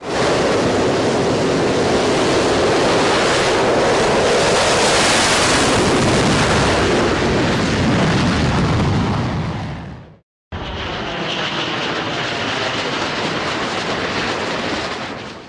F-35C Liftoff and Fly-by
plane, navy, jet, jetpack, aircraft, lift-off, liftoff